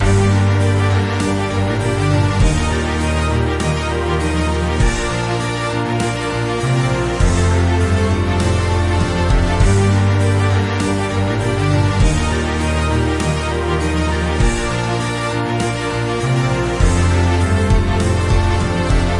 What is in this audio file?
Quick orchestral short. Loop was created by me with nothing but sequenced instruments within Logic Pro X.
orchestral, epic, percussion, drums, orchestra, loops, loop, music